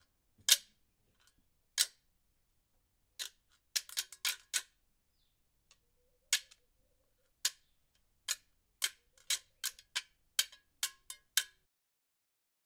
Metal pieces colliding with each other: a slight clang, metal on metal. Light impact,. Recorded with Zoom H4n recorder on an afternoon in Centurion South Africa, and was recorded as part of a Sound Design project for College. Two metal brackets were used to create the sound